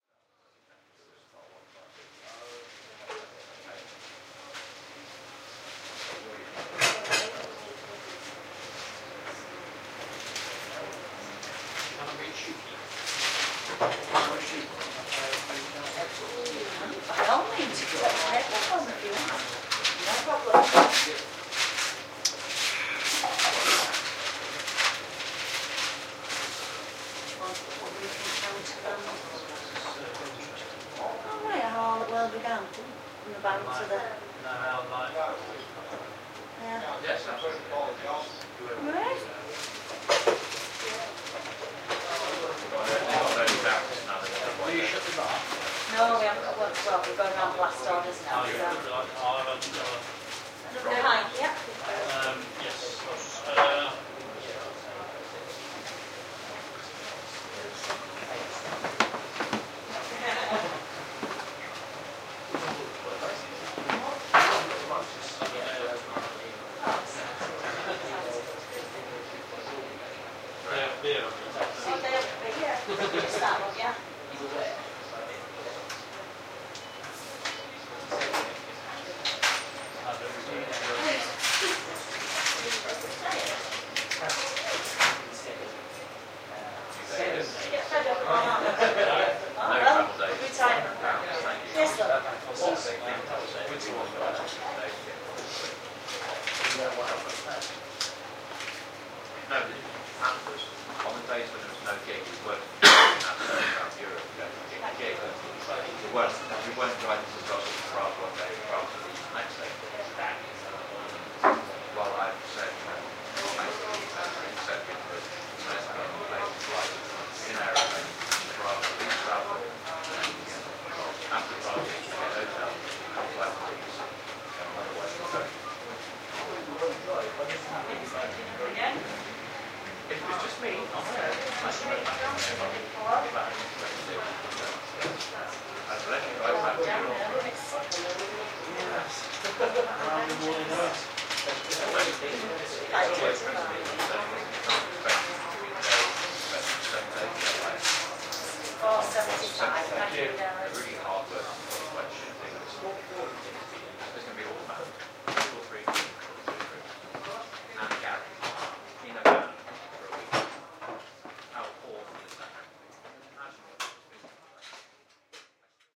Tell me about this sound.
Contrary to popular opinion, British pubs are not full of football hooligans displaying their rear-ends, fighting, shouting and being offensive. They are usually fairly quiet places, populated by a handful of local customers, politely chatting about the day's events and exchanging greetings. This recording was made at The Victoria in Fishergate, York on the 9th Nov (Sunday) around closing time (about half past ten) You can hear the landlady mention 'last orders' to a customer who has just asked to cut a page from the complimentary newspaper.